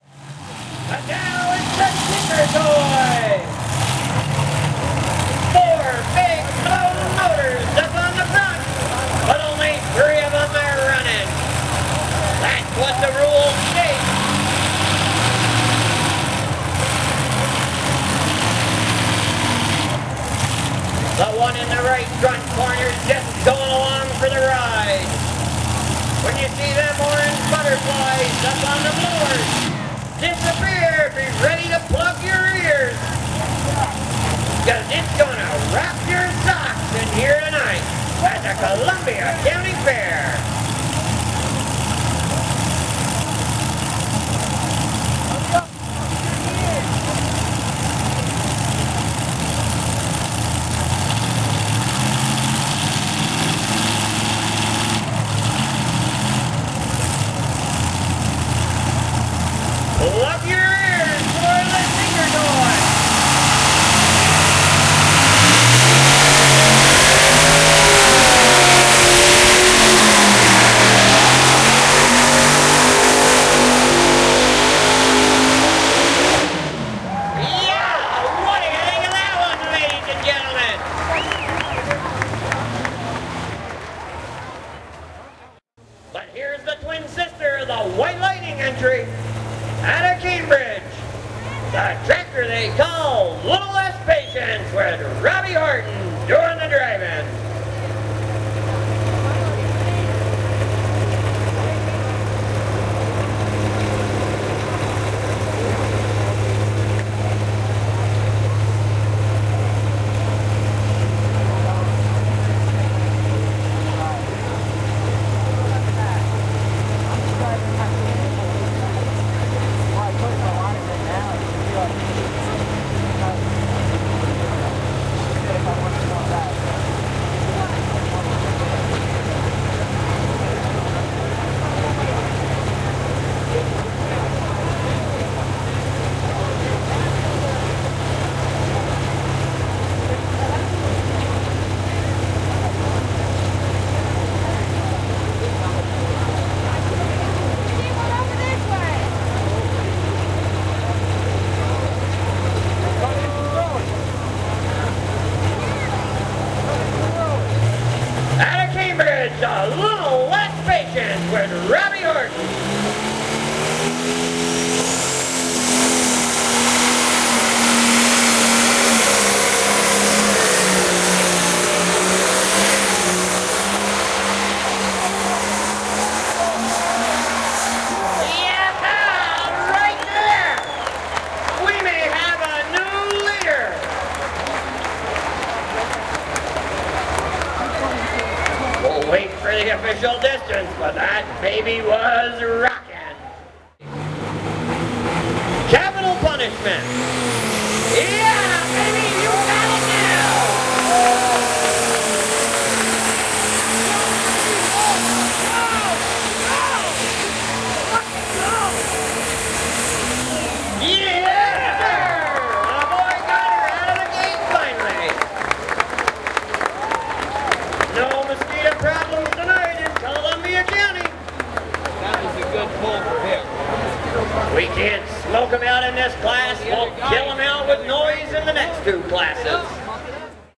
County Fair Tractor Pull
Tractor Pull competition recorded at the Columbia County Fair, upstate New York, USA
Large, loud and colorful customized tractors compete by pulling heavy sleds across a field. Longest distance wins.
mini-disc